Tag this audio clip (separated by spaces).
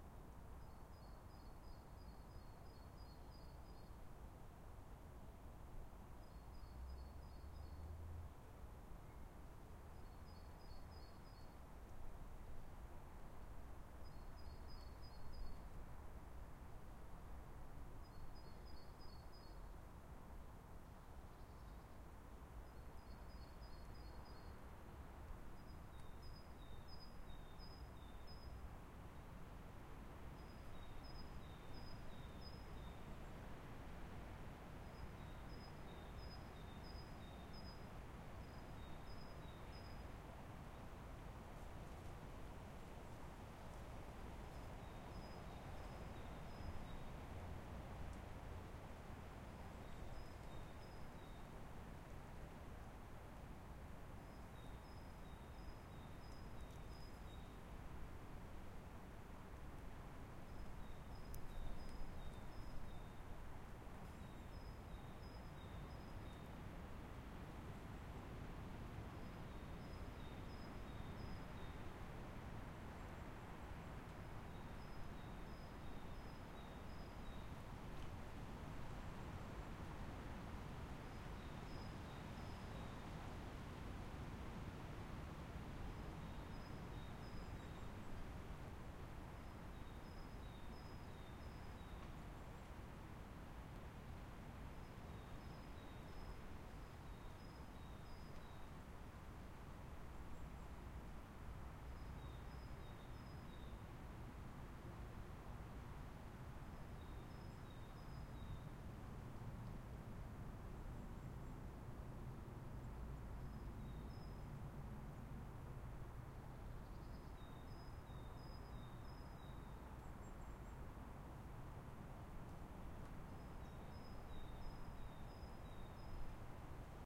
ambience ambient background-sound birds branches calm forrest leaves recording sound sounds soundscape